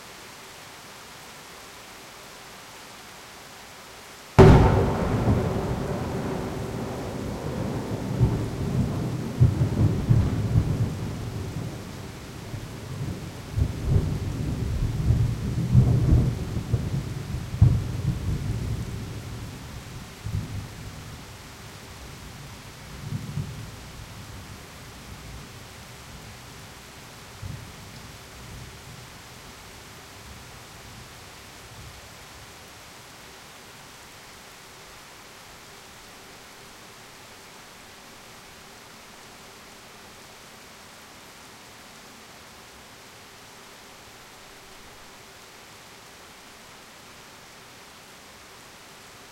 Summer Storm Thunder LightingStroke Short Loud
Edited from small summer storm (8minute) thunder was very loud
almost like a explosion
kvarner, lighting, loud, short, storm, stroke, summer, thunder